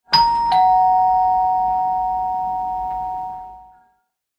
Signaali, äänimerkki, ding-dong / Signal, ding-dong, before an announcement or a call at the airport, turn at a service counter
Kuulutusta edeltävä pling-plong, ding-dong signaali. Sopii myös palvelutiskin vuoron merkkiääneksi.
Paikka/Place: Suomi / Finland / Helsingin lentoasema (Seutula) / Helsinki Airport
Aika/Date: 04.03.1971
Announcement, Ding-dong, Field-Recording, Finland, Finnish-Broadcasting-Company, Kuulutus, Merkki, Signaali, Signal, Soundfx, Suomi, Tehosteet, Turn, Vuoro, Yle, Yleisradio